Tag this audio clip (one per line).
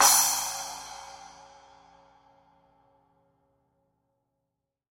metal
octagon
heavy
drum
kit
signature